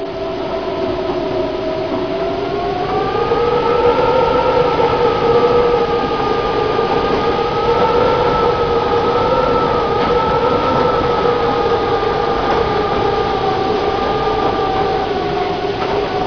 there was a big storm in my city and i opened the windows to feel the draught. i nearly closed my door. i left a little bit space only and i recorded the sound of this little space:)